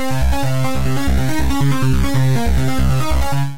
Synetry Sci-fi
Distorted synth bass. 140 bpm
140, bass, beat, bpm, distorted, distortion, hard, progression, sequence, synth, techno, trance